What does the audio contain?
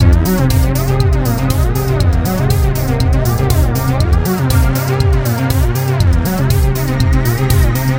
Beat, Loop, Sound designed for a halloween theme. It is short, 4 bars and recorded at 120 bpm.
Loop, Sound, Beat